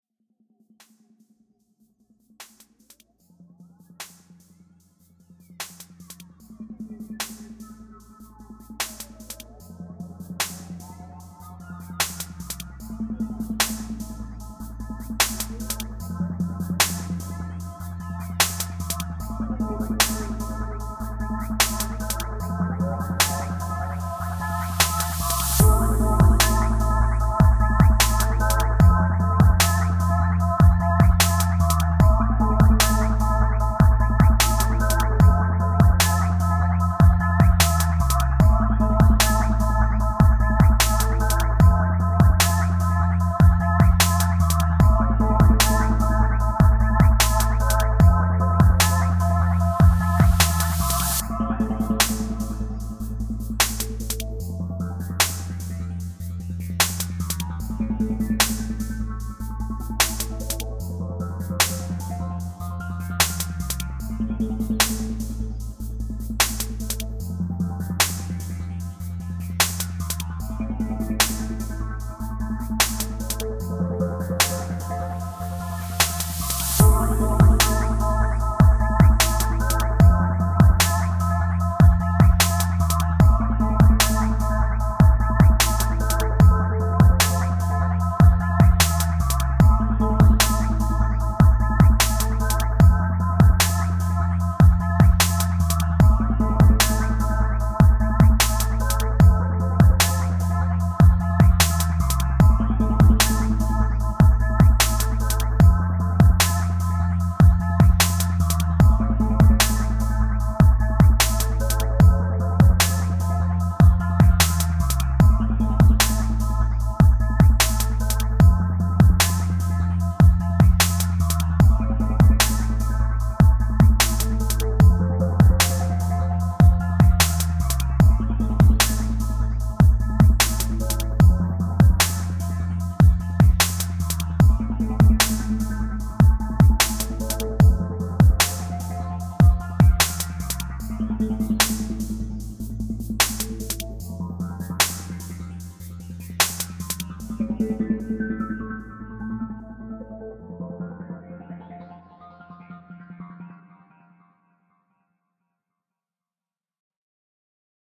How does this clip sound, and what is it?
Rhythmic Game Menu Ambience
A cool ambient melody for gameplay or as menu music - created using FL Studio.